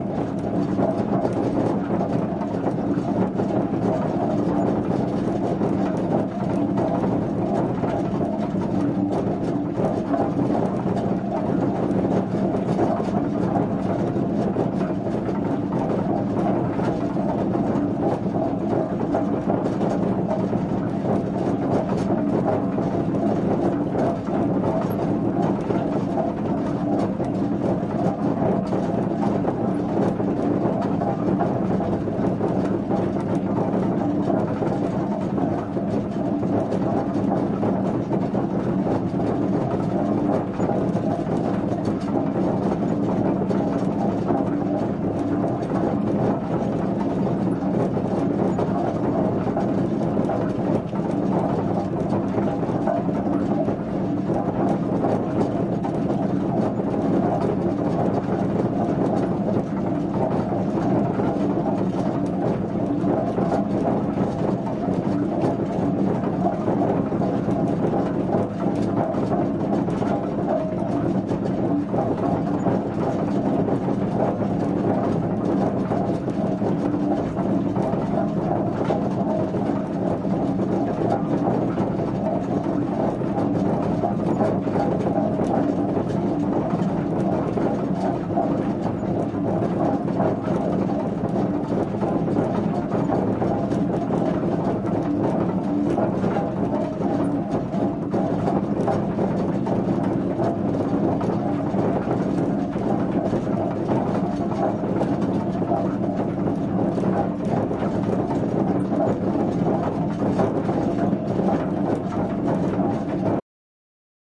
Water mill - loud gears
These sounds come from a water mill in Golspie, Scotland. It's been built in 1863 and is still in use!
Here you can hear the big gears coming directly from the mill wheel in the ground floor of the building.
historic, machinery, mechanical, water-mill